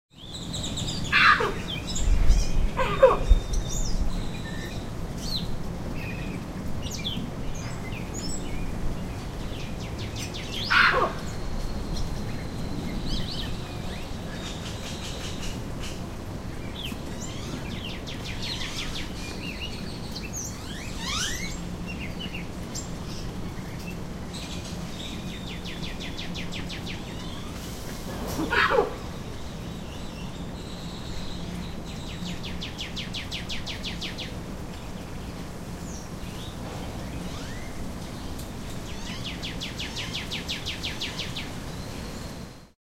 Red-capped Mangabeys calling with various birds and a small stream in the background. Recorded with a Zoom H2.
cardinal, catbird, field-recording, grackle, mangabey, monkey, primates, stream, water, zoo